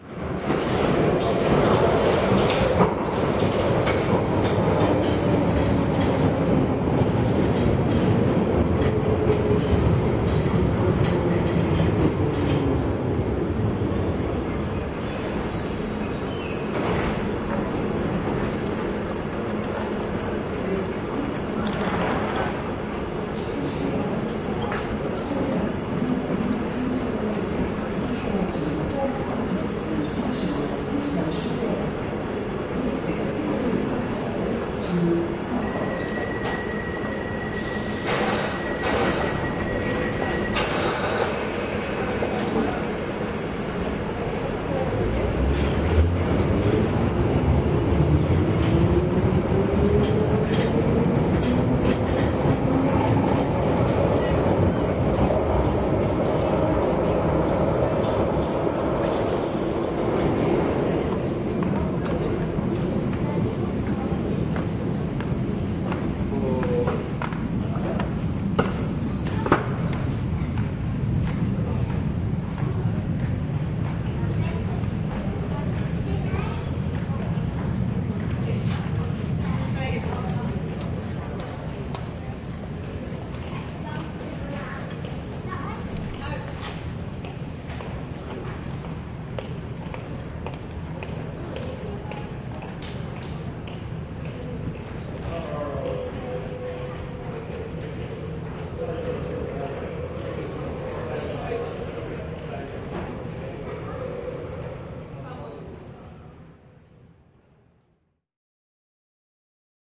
808 Kings Cross Underground 6
General ambience of an underground station. A "tube" train arrives and departs and a female announcer can be heard as well as passengers and footsteps on the platform. Recorded in London Underground at Kings Cross station.
announcement, field-recording